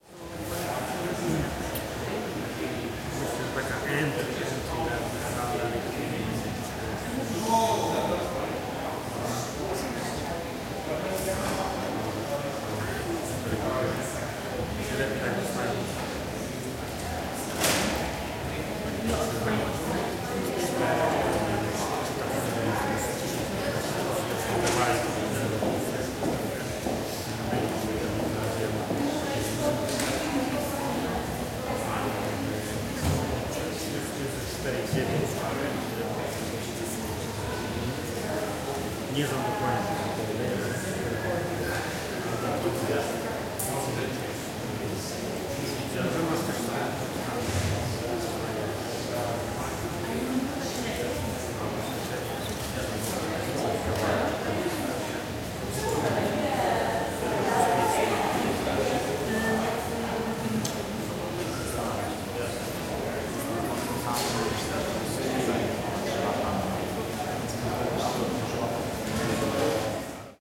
walla nike headquarters large hall dutch english
Zoom iQ6 X/Y stereo recording in Nike headquarters Hilversum. Large hall, lots of reverb, also useful as museum ambience.
ambiance, ambience, ambient, background-sound, chat, chatter, chatting, conversation, crowd, dutch, english, field-recording, general-noise, group, hall, interior, intern, internal, large, murmur, nike, reverb, soundscape, speaking, talk, talking, voices, walla